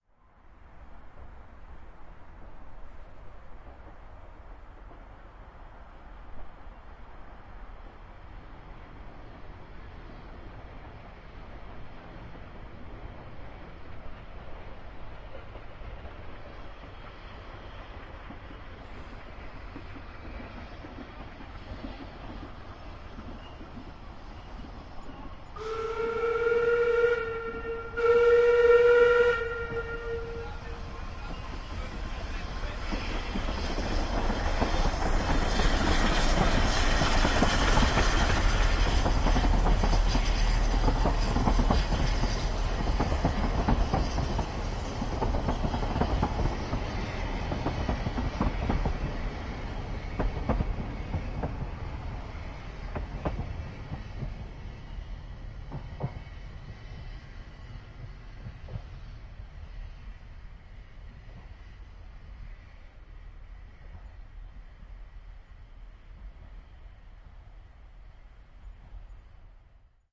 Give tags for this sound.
field-recording locomotive steam steam-train stereo train whistle xy

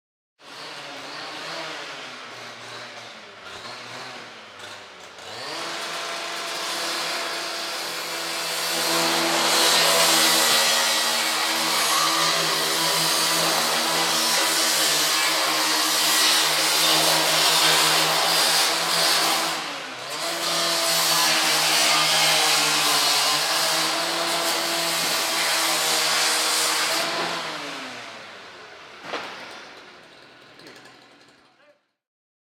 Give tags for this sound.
building construction field-recording site industrial machine

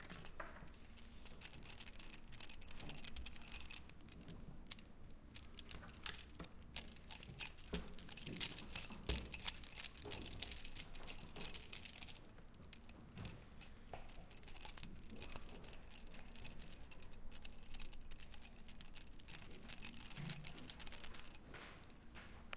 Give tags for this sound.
2013 Lamaaes TCR

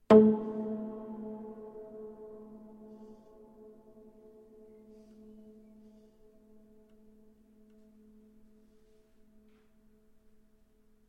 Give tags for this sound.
muted-strings piano Upright-piano

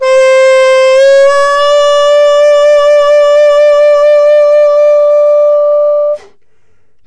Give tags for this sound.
tenor-sax vst jazz sampled-instruments woodwind sax saxophone

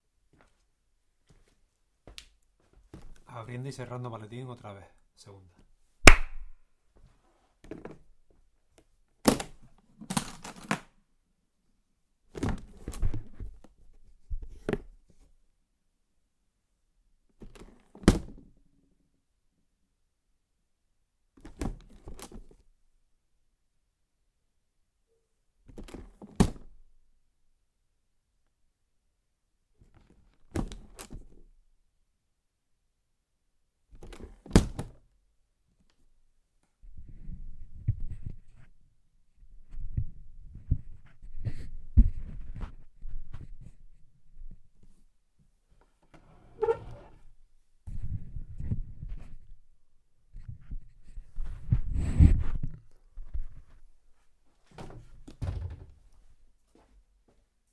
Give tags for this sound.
briefcase open-close

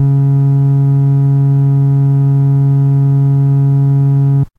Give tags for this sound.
ace acetone bass basspedal organ pedal sub subbass tone

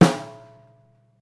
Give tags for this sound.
acoustic drums

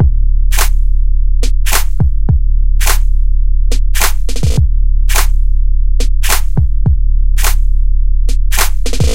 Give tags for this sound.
BPM Electric-Dance-Music EDM Clap Waves hop Loop Hi-Hats Drums Hip Snare 105 Abelton Kick Drum Sample

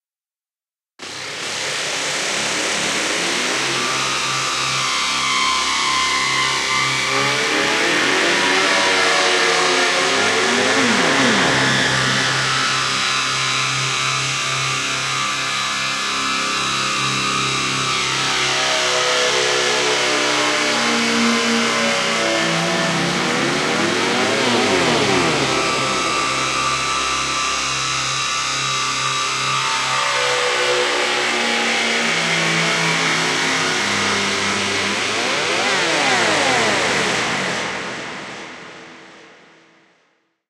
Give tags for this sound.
abelton bike field-recording processed-sound reaper rubber-scratch scrape SD702 s-layer spinning tire

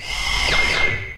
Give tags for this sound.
machinery noise industrial mechanical robot loop robotic factory

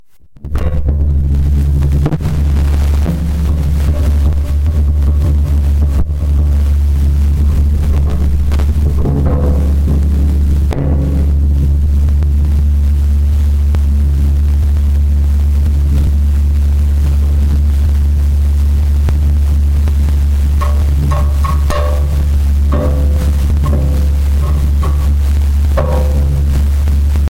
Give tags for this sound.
noise scraping table

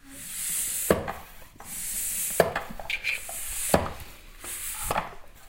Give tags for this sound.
natural air purist ball pump

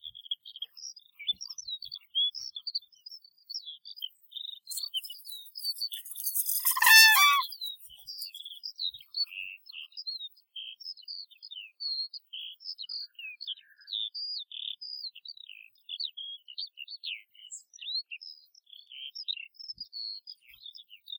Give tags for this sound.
Birds Forest Nature

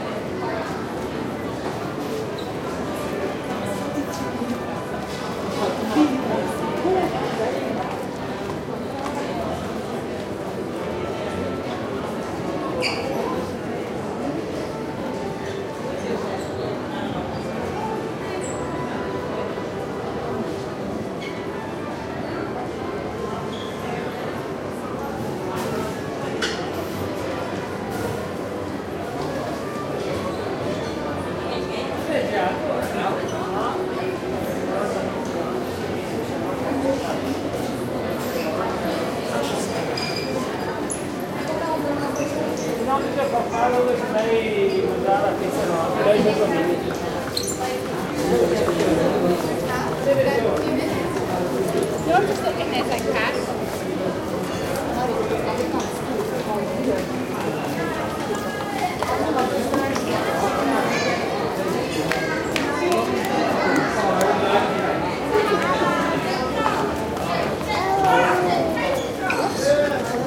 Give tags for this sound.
city mediterranian bustling noisy Venice people Italy urban atmo busy tourist field-recording Venezia

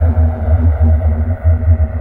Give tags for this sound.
120-bpm ambient drone drone-loop loop rhythmic-drone

